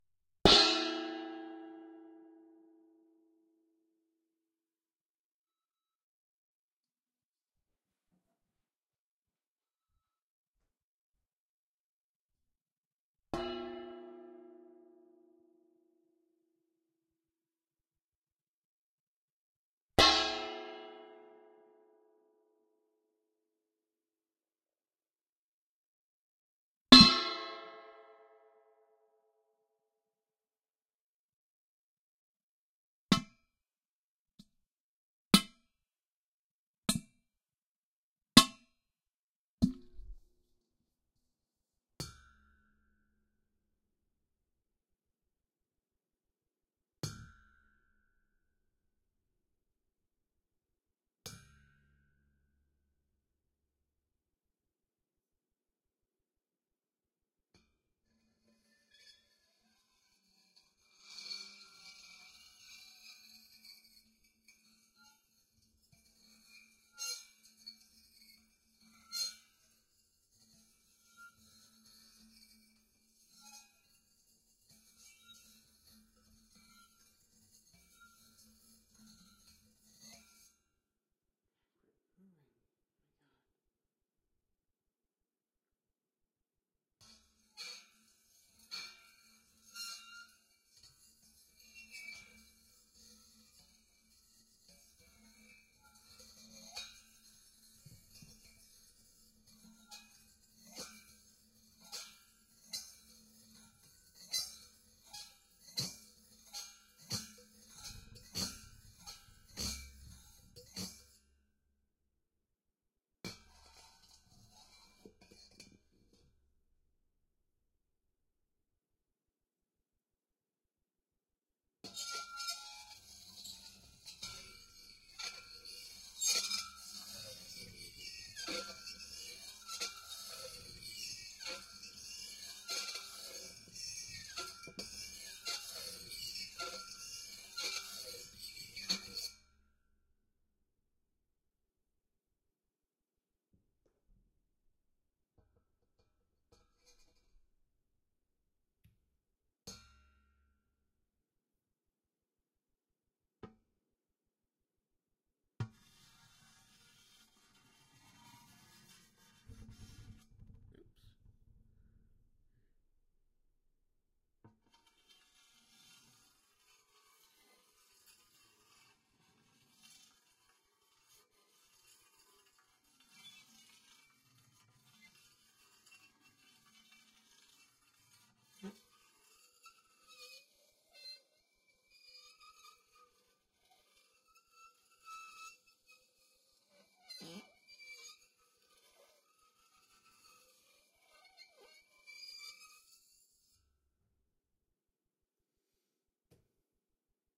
Metal Bowl Smack and Drag
Struck a metal bowl and dragged a knife along the edge and inside going in circles around a Zoom ZH1. Denoised with iZotope RX.
knife, Metal, percussion, shred, splash